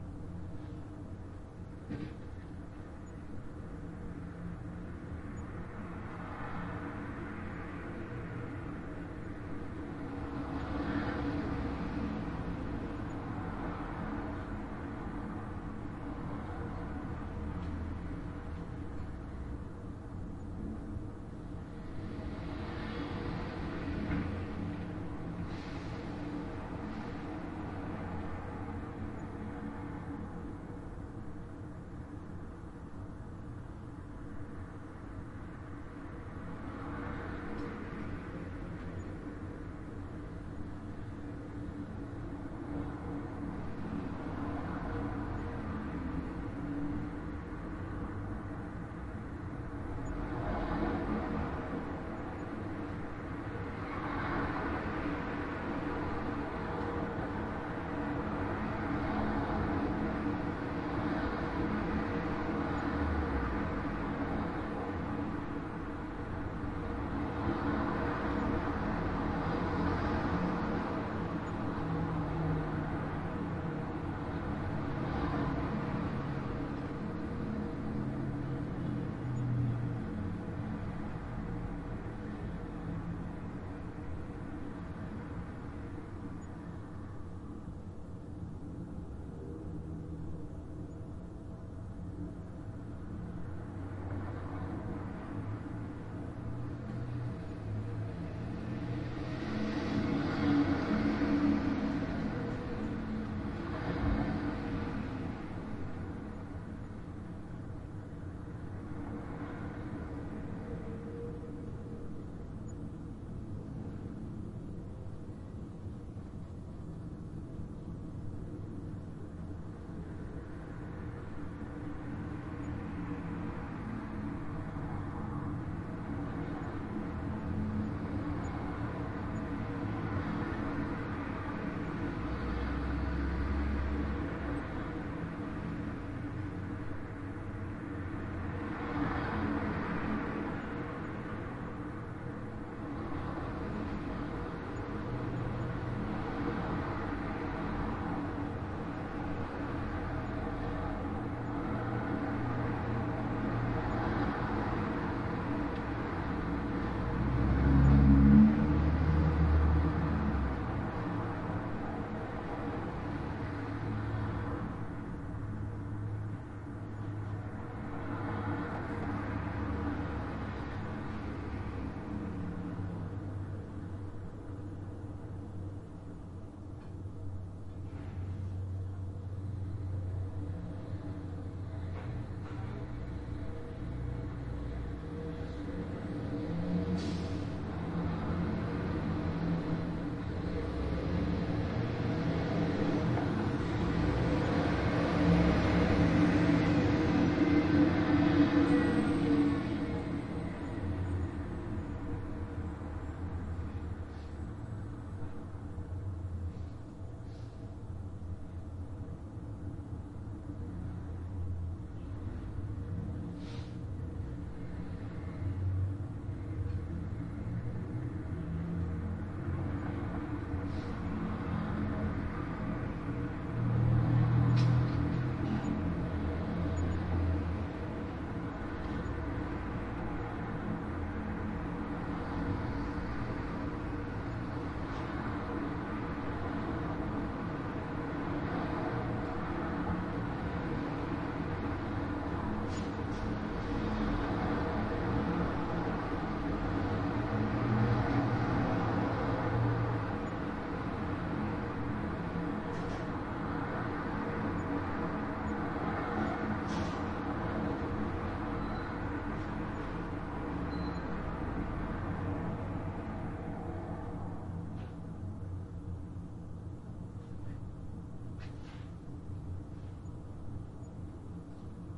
Traffic heard from apartment interior
Traffic heard outside closed window during winter.
Recorded in M/S with a Schoeps CMIT and CMC6 w/ MK8 capsule on a Sound Devices 633. Decoded to L/R stereo.
winter, closed, window, interior, Apartment, Traffic, Ambience, stereo